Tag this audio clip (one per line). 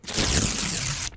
ripping
book
long
paper